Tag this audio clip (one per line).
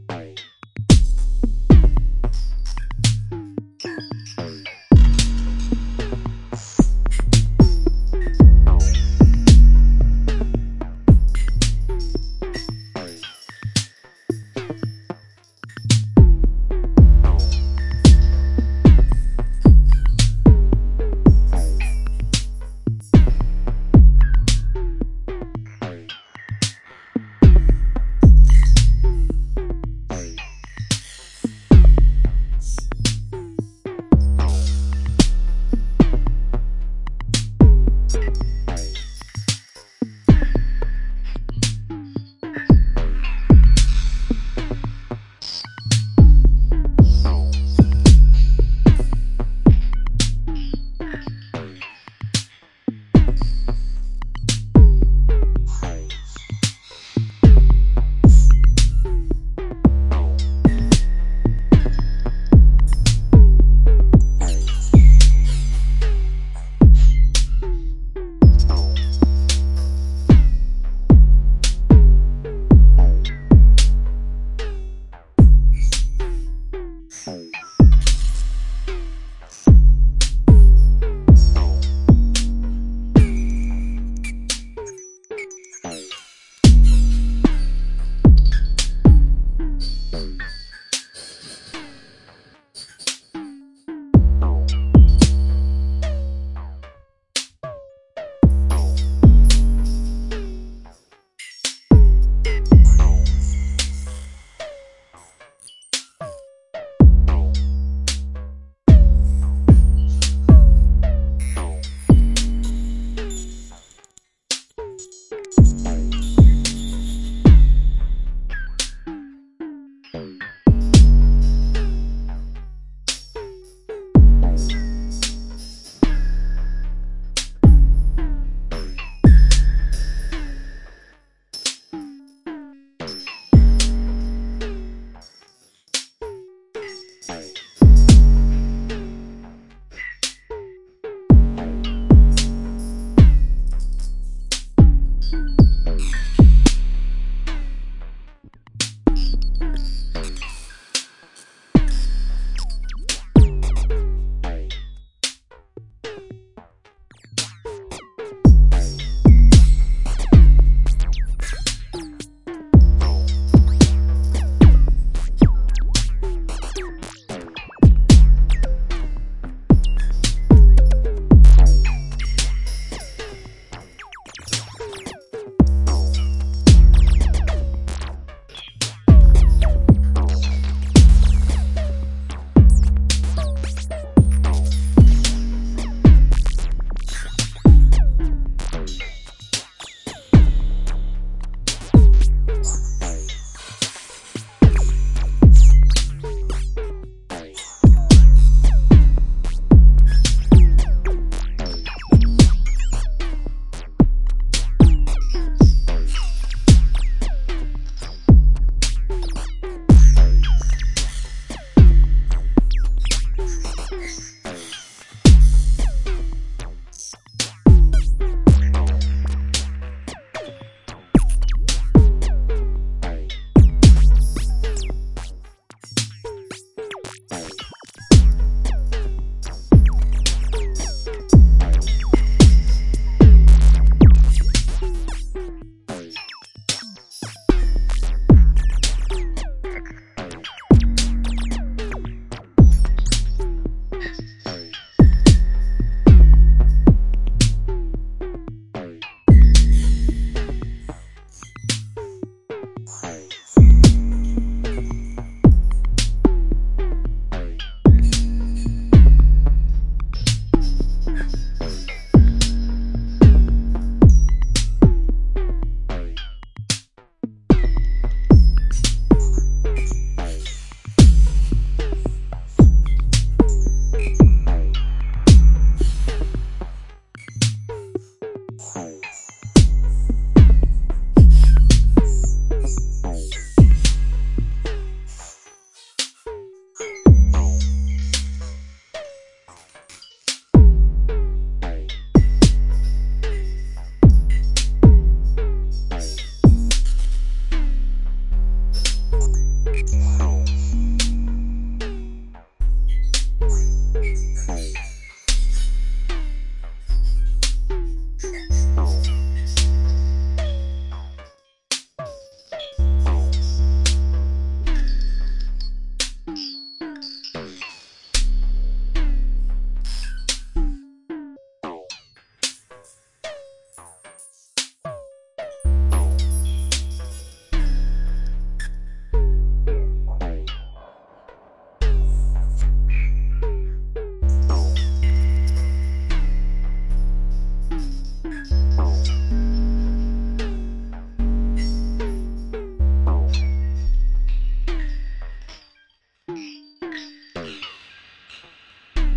beat
drum
electronic
glitch
hiphop
loop
modular
noise
synth